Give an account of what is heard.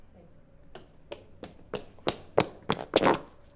woman running on pavement stops hiheels 4
footsteps, pavement